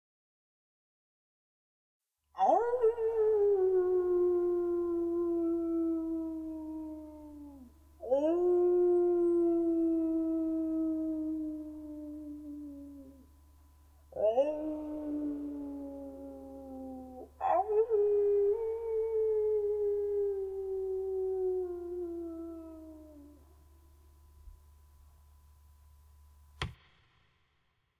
single Wolf Howls with slight echo so it sounds distant